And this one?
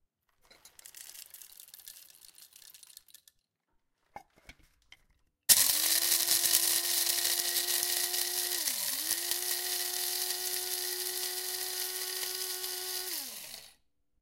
Coffee grinder
Filling a coffe grinder with coffee beans and grinding. Recorded with a Zoom H1.
beans, brewing, cafe, coffee, coffee-machine, espresso, grinder, grinding, italian, italy